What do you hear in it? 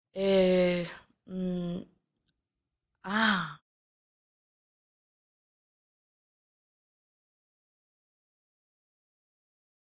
eh hmm ha sr
audio, dialogue, homework